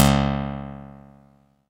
clavinova sound sample